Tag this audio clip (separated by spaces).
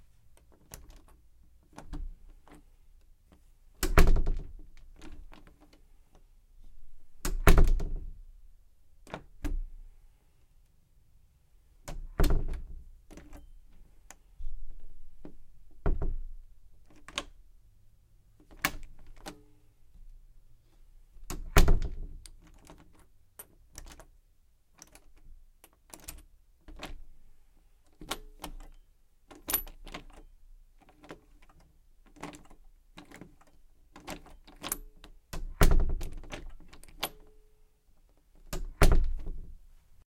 door house lock open